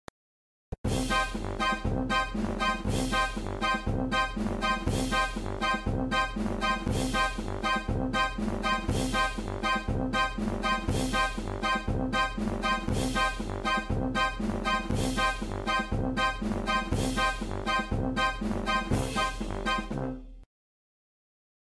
Nightmarish Circus March
A march-like tune featuring a horn, organ and cymbal. Made to sound like a theme played at the circus.